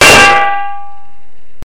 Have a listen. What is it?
Metallic Clang
Could be used for a hammer banging against metal.
metal, steel, hit, bonk, blacksmith, impact, clang, ting, iron, clonk, metallic